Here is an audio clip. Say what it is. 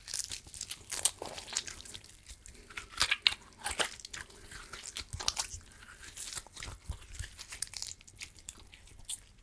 Some gruesome squelches, heavy impacts and random bits of foley that have been lying around.